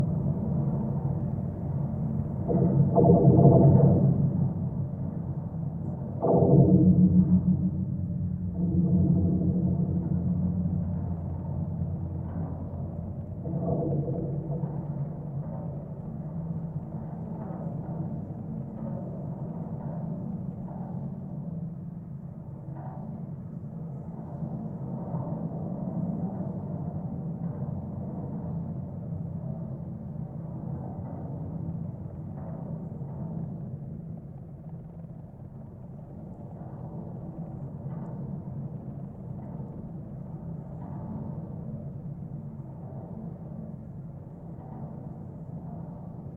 GGB 0317 suspender NE42SE
Contact mic recording of the Golden Gate Bridge in San Francisco, CA, USA at NE suspender cluster 42, SE cable. Recorded February 26, 2011 using a Sony PCM-D50 recorder with Schertler DYN-E-SET wired mic attached to the cable with putty. This is one quarter of the way across the span, heading south.